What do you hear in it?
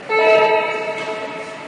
the tone used at the post office here to summon the next customer / tone de la oficina de correos que significa 'siguientee!'